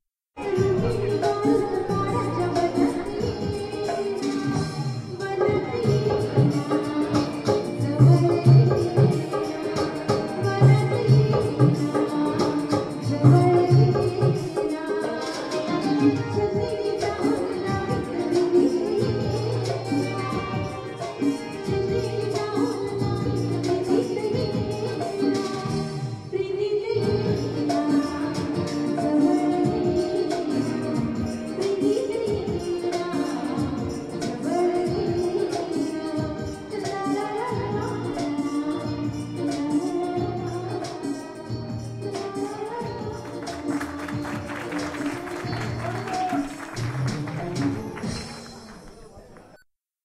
Recorded in Bangkok, Chiang Mai, KaPhangan, Thathon, Mae Salong ... with a microphone on minidisc
thailand, temples